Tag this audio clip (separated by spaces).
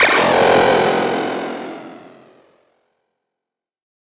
porn-core bounce glitch-hop sci-fi random effect electro dance processed dark sound acid house trance club synth rave lead